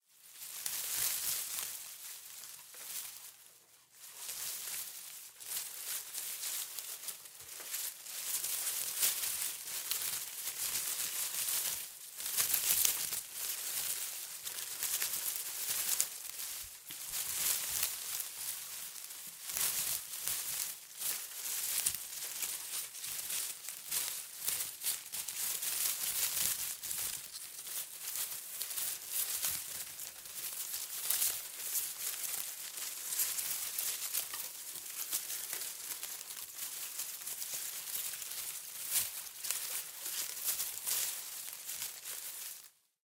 Foliage Rustling 001
The sound of rustling around through leaves or a bush of some kind.